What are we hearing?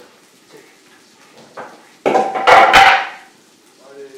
FX - caida tabla de madera
fall, wood